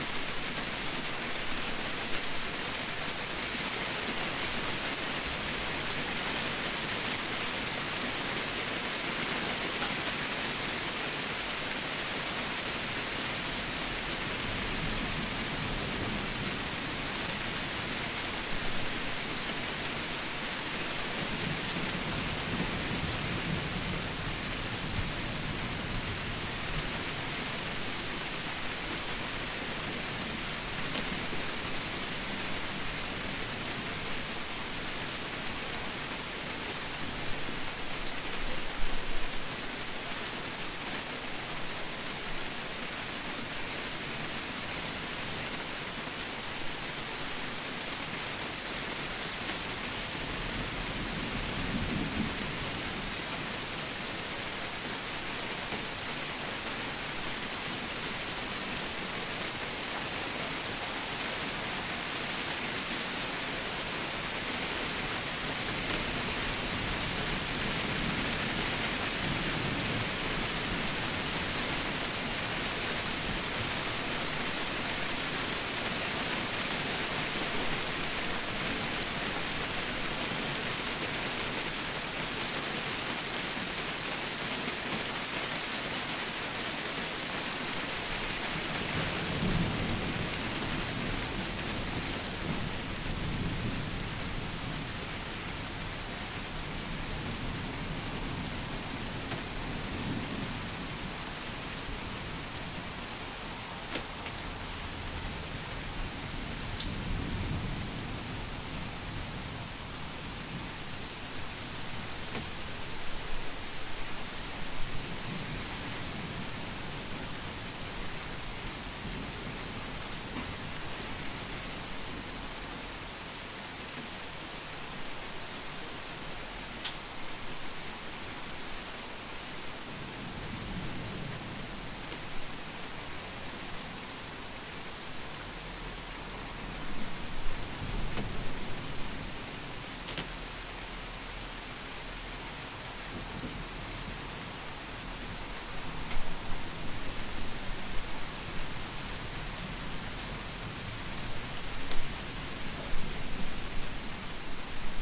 rain under plastic roof and light thunder 001
rain under a plastic roof, light thunder in the background
field-recording, outdoor, rain, raining, thunder, weather